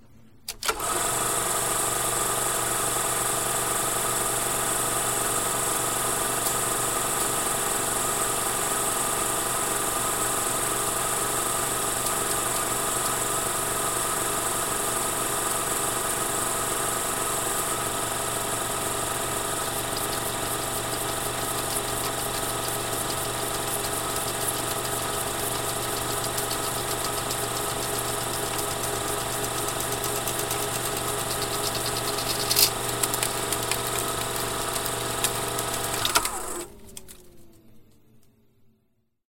Film Projector - Reel Runs Out
An 8mm film projector starting and running before the reel begins to run out and the last of the film is pulled through.
8mm, end, film, finish, out, projector, reel, runs